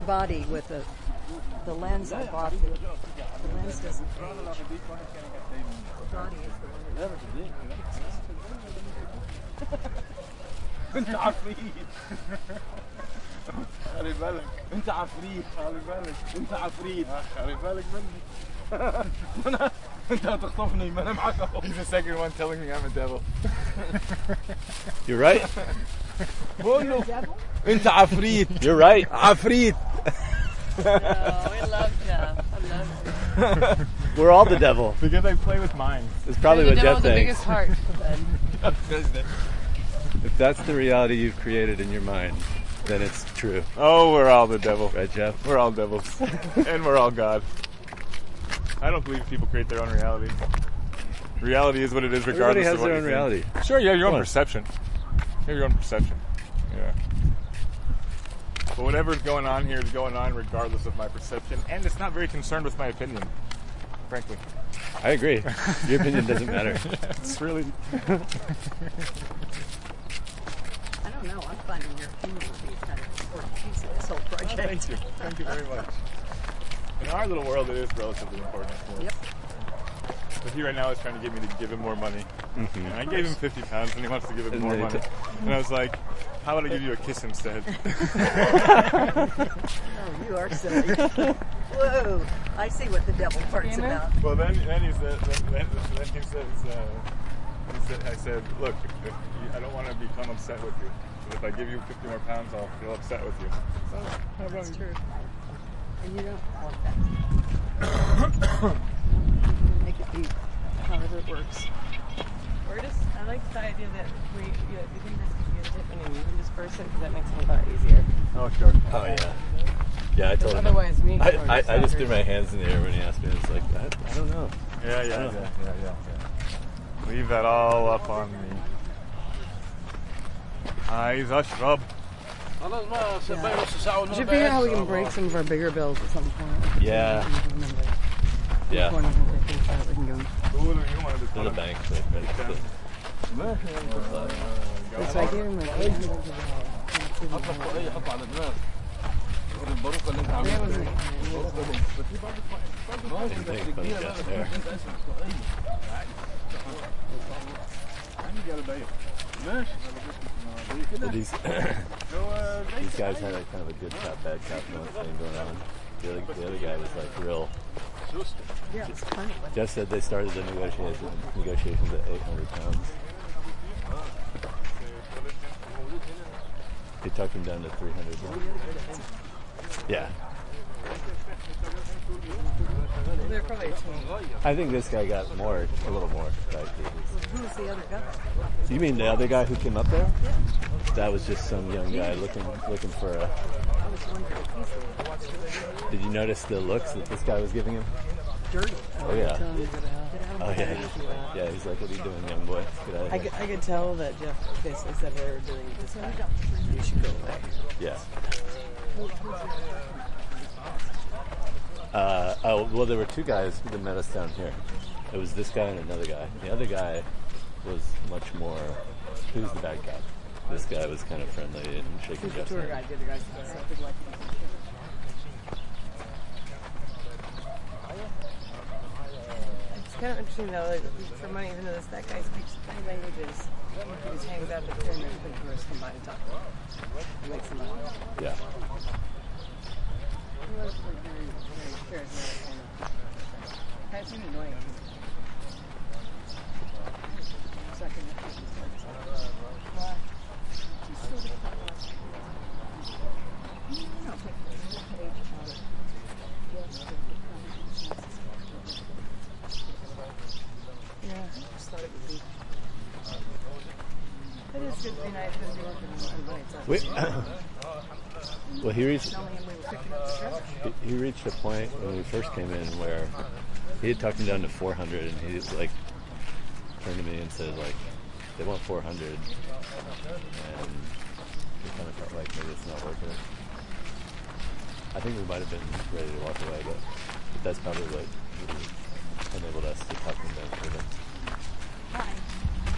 saqqara outside
Walking back to the car after exploring the ancient sites in Saqqara
saqqara, field-recording, voices, ambient, egypt, talking, english, outdoors, speech, noise, walking, people, arabic, atmosphere, ambience